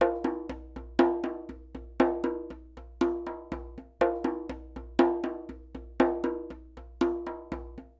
djembe grooves fanga3 120bpm
This is a basic Fangarhythm I played on my djembe. Recorded at my home.
africa, djembe, drum, ghana, percussion, rhythm